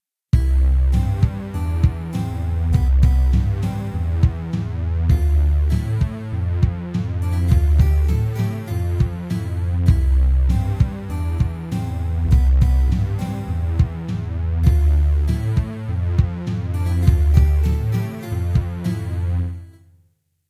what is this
BACKGROUND MUSIC for your project
Try out this loop! has a catchy vibe to it. I hope it works for you1 I made it using a Casio ctk-6250.
credit
"Background music from Nicholas The Octopus Camarena"
Nicholas "The Octopus" Camarena
camarena, loops, listen, nicholas, loop, theme, octopus, element, free, sampling, roytal, instrument, background, instrumental, music